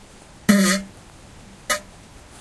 fart poot gas flatulence flatulation explosion weird noise
flatulation, explosion, gas, flatulence, fart, noise, weird, poot